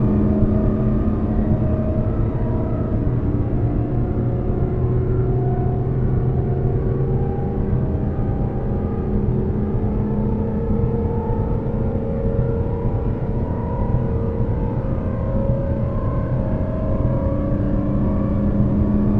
engine rise up
Sci-fi engine sound ("loopable") made on Massive X, using a shepard tone effect.
engine, game, loop, sci-fi, shepard-tone, space-craft, spacecraft